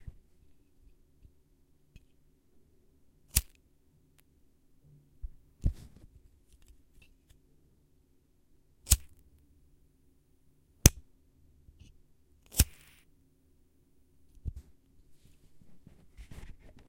A Lighter being Lit
Light, Fire